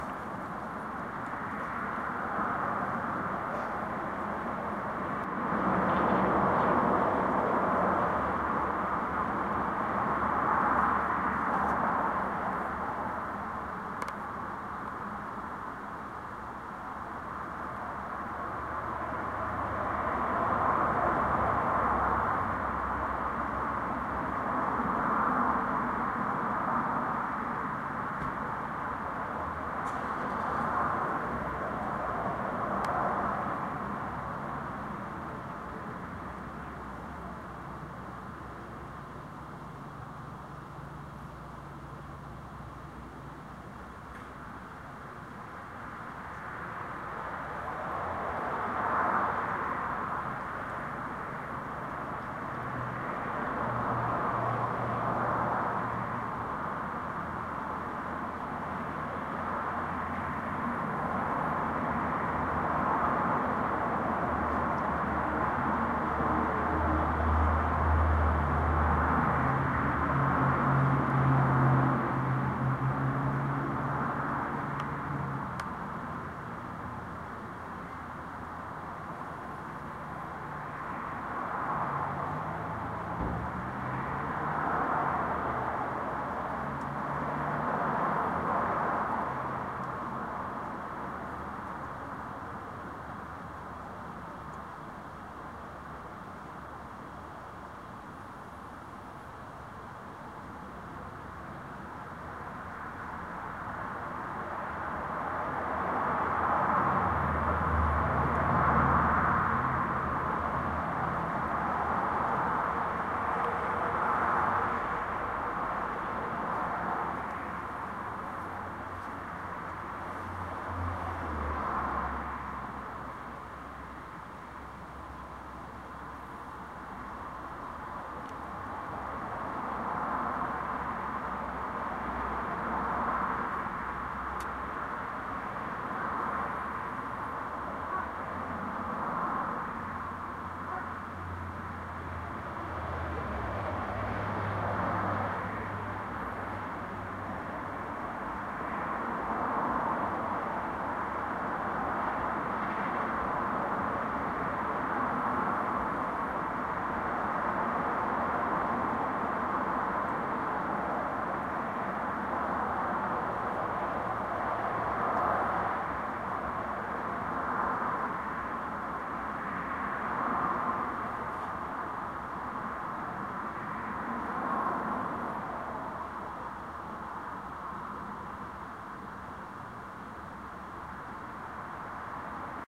Roadside Parking Lot 1
Cars can be heard driving by, along with a faint humming with from some machinery and a small amount of wind. There is also some faint talking, laughing and coughs from a group in one of the apartment buildings, which can add to the effect. There are two instances when cars drive into the parking lot (about at 3:20 and 3:40), and shortly afterward a person walks by the mic. Recorded with the microphone of a Nikon Coolpix camera.